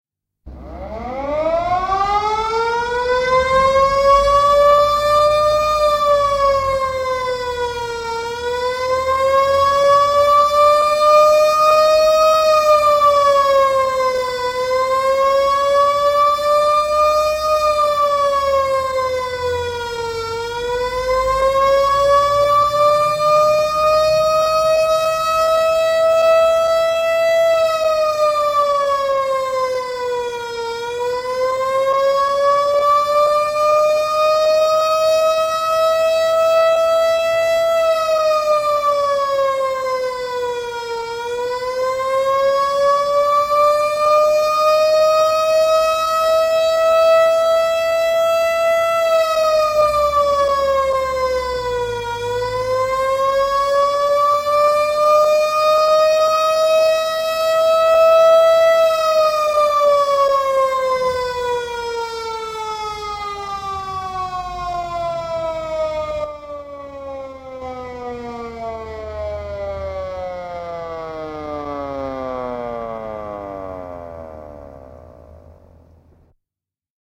Hälytyssireeni paikallaan vähän kauempana, ulvova (wail). Pitkä sammuminen.
Paikka/Place: Suomi / Finland / Helsinki
Aika/Date: 09.02.1957